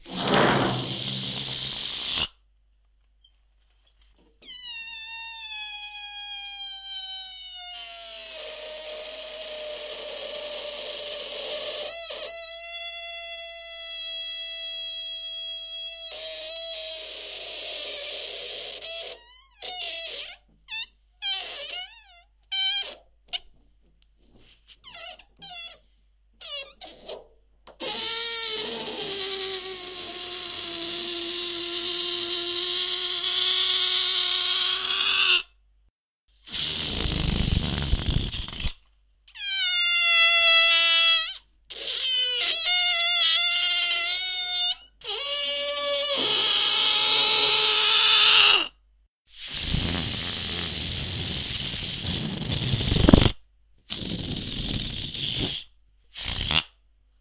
Random Balloon Sounds
Some unused balloons sounds